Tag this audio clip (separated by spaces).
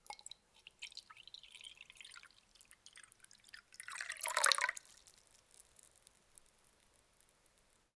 glass drinks kitchen pouring water